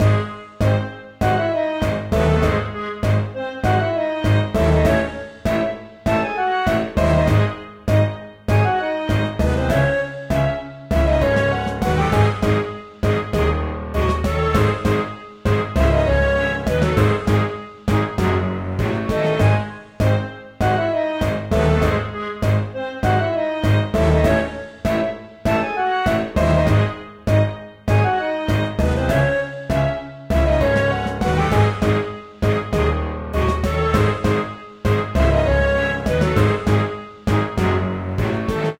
Major Morales is inspecting the regiment.